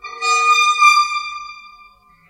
The stools in the operating theatre, in the hospital in which I used to work, were very squeaky! They were recorded in the operating theatre at night.
percussion, stool, metal, squeak, hospital, friction